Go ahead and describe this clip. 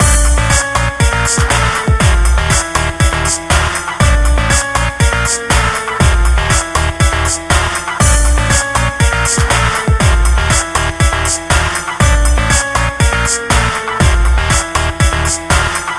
Loop Fighting Evil Mummies 02
A music loop to be used in fast paced games with tons of action for creating an adrenaline rush and somewhat adaptive musical experience.
gamedev, Video-Game